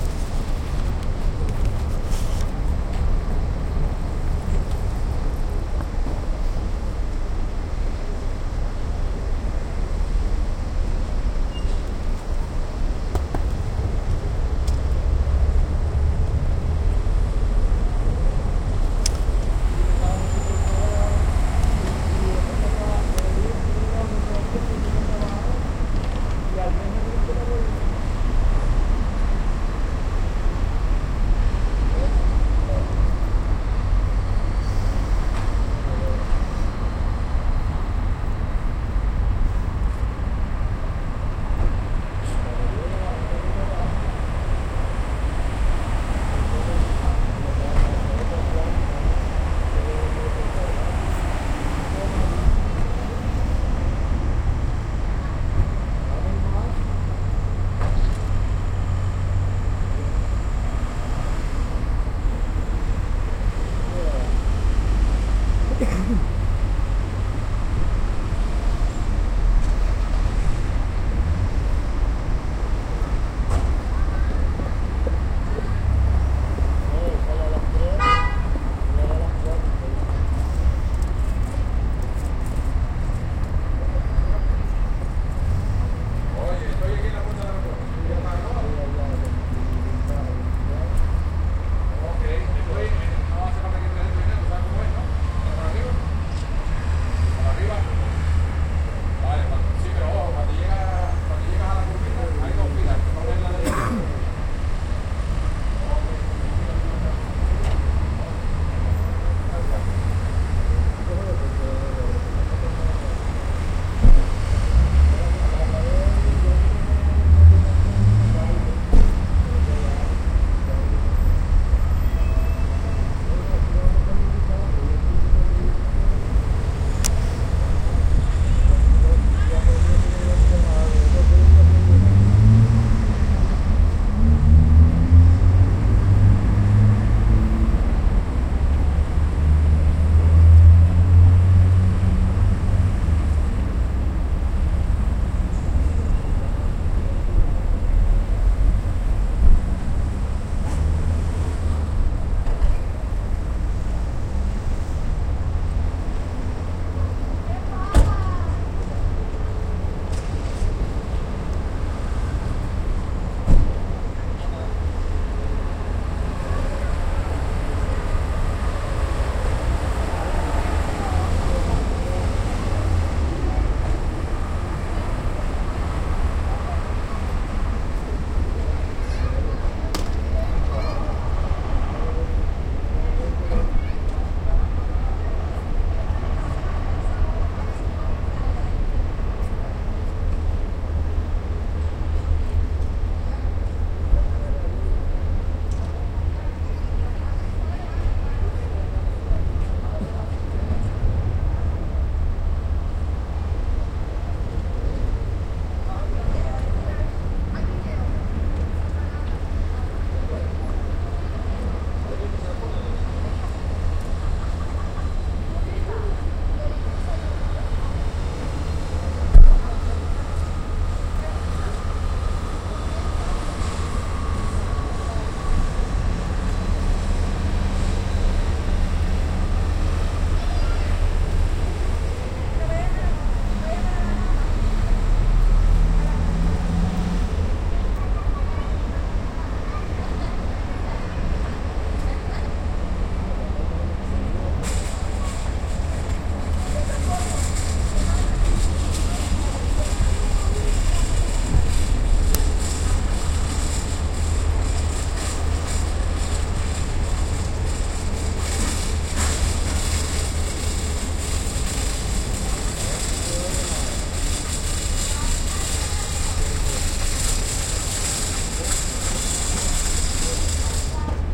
llegada coches
Ambience of the automobile area in an airport. Recorded with the Marantz PMD 661 MKII internal stereo mics.